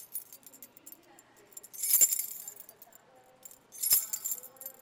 keys jingle
Large about of keys on a key ring being shaken
keys, narrative, sound